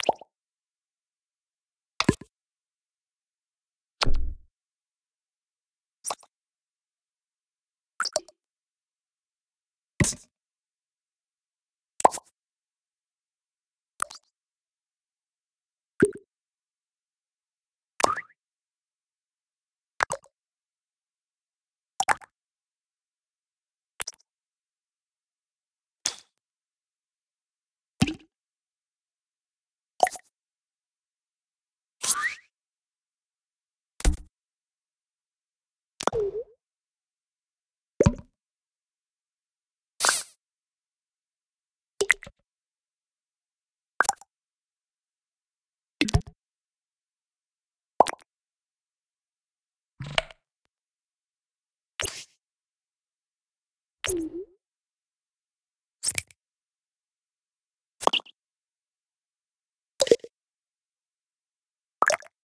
A collection of 32 sound effects for UI and generic menus in apps etc. All the sounds are recordings of me making sounds with my mouth into a microphone. The sounds are then afterwards Edited, Eq'ed, pitched and combined in various ways.